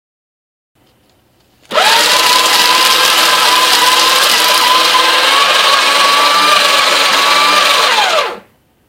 A shredder shredding paper